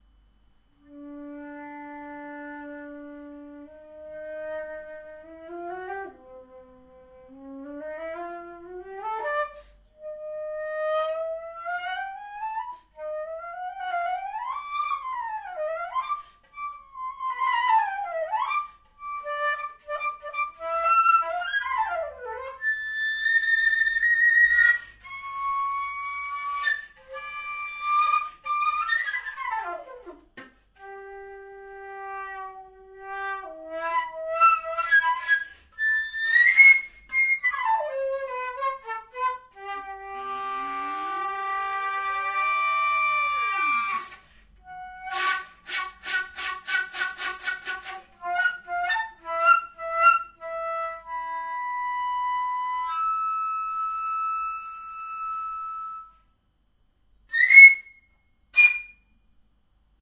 recorded with a zoom H2N
girl playing the german flute
music, playing, classical, flute, tranverse, german, musician